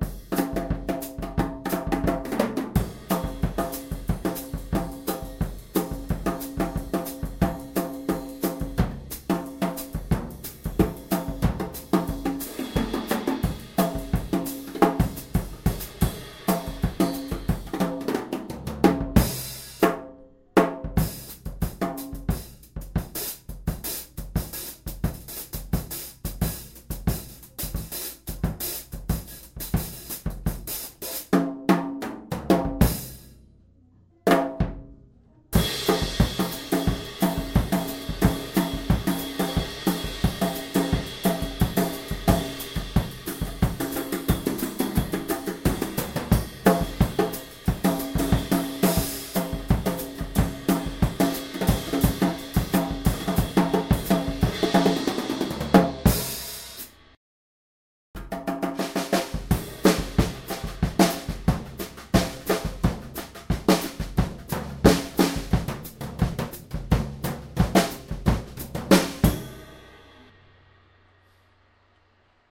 Gretsch snare + Ludwig kit - 1 - samba stuff
Some drum beats and fills played with "hot rods" for a sound inbetween sticks and brushes. Gretsch maple snare 14x6.5 with no damping material so lots of ring and tone - with and without snare wires on, Ludwig drum kit with lots of tone in the kick. Bosphorus cymbals.
Grab bag of samba-ish playing.